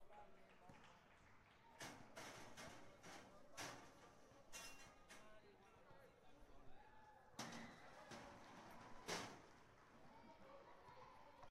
Uni Folie MovingMetalPublic
Moving a metallic object in public.